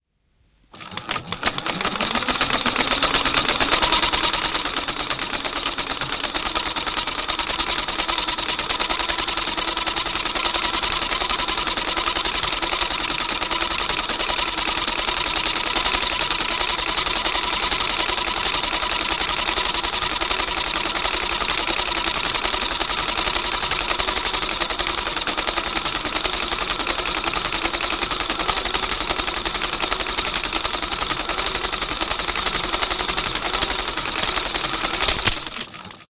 Vanha filmiprojektori Pathe Freres, vm 1905. Projektori pyörii, pyöritetään käsin. Filmi katkeaa lopussa. Lähiääni.
Paikka/Place: Suomi / Finland / Helsinki
Aika/Date: 17.02.1983